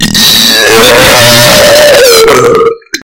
Dragon Roar
made with my own mouth
beast, creature, creepy, dragon, growl, monster, roar, scary, zombie